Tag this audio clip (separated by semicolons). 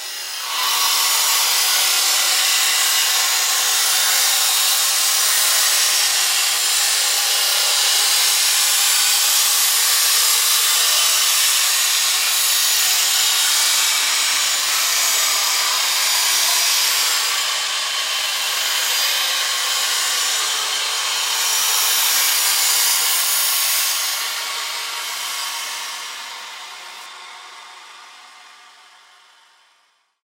industrial; metal; power-saw; synthetic